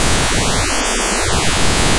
Pencilmation's Profile on spectrogram
Picture, Profile, Pencilmation, Image